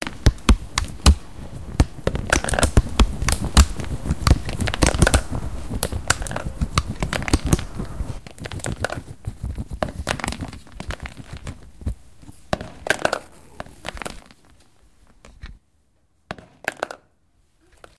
first soundscape made by pupils from Saint-Guinoux